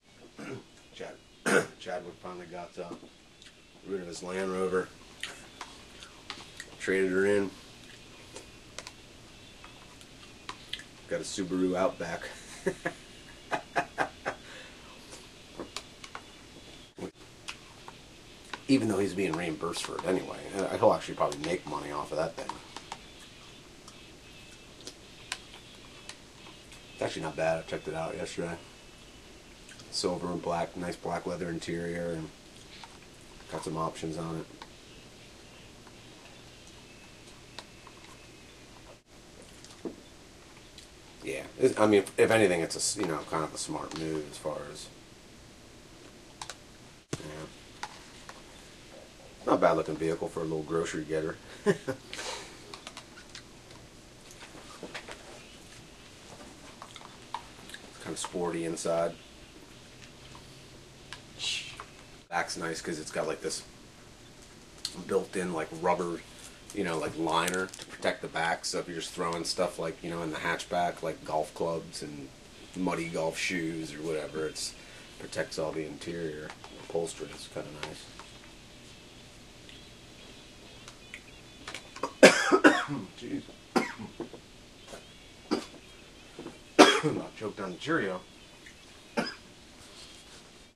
Sound of a person eating with their mouth open in an office environment. Speech has been removed.